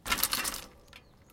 bike thump2
bicycle hitting ground after a jump
bicycle, bike, ground, thump